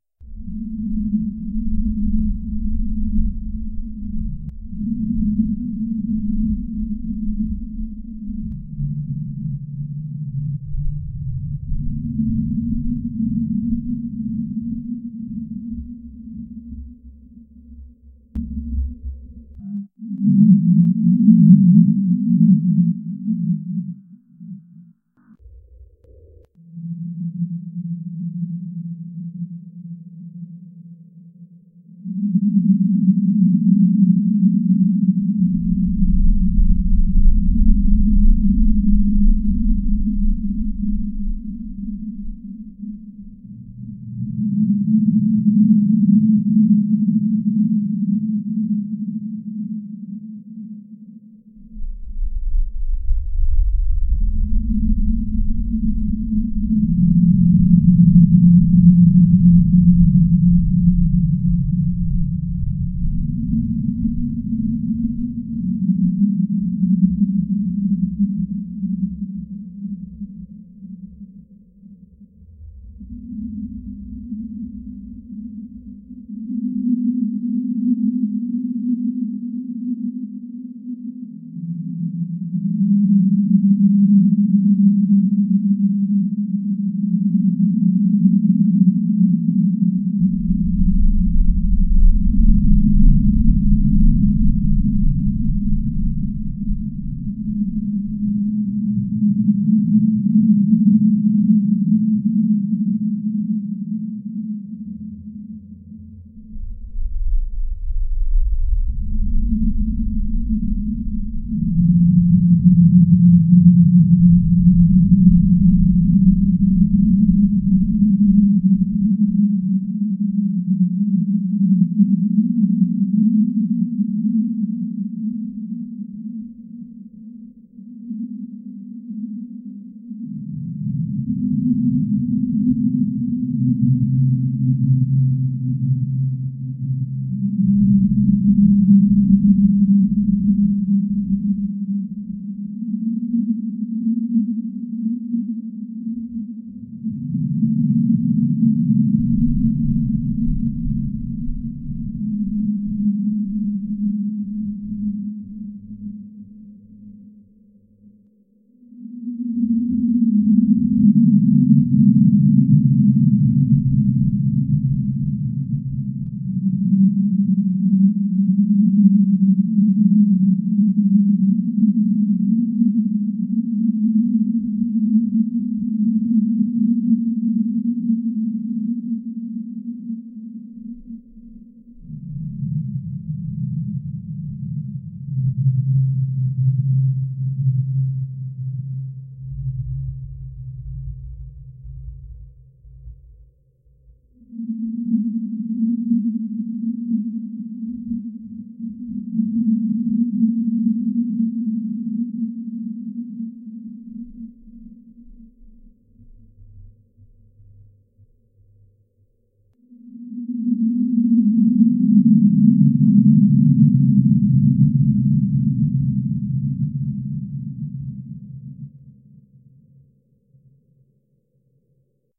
To me the final effect sounded like a haunted space ship. I began with a 10 second sample of ordinary speech then lowered the pitch, stretched the wave pattern, added echo and reverb. Then I copied portions of the wave pattern to a new track and mixed the two into a mono track. Did this several times while also using noise reduction and normalization.